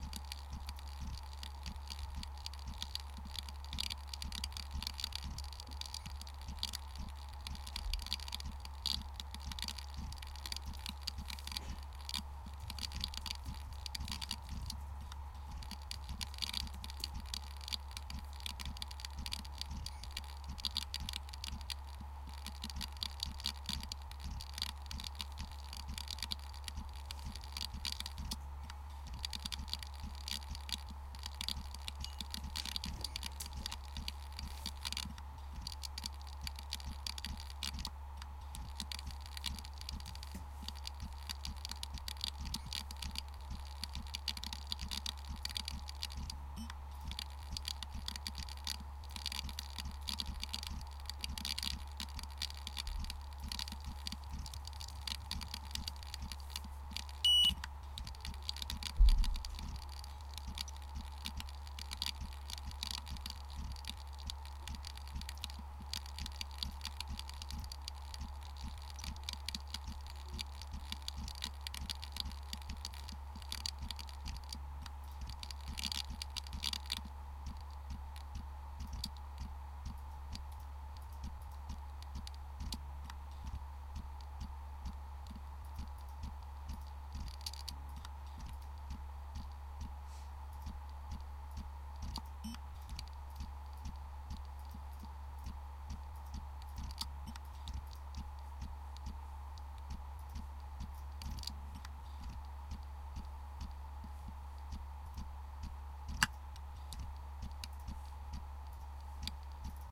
broken harddrive
The sound of an (almost) broken hard drive. including a "meep" and a "beep" :) recorded with the zoom h2.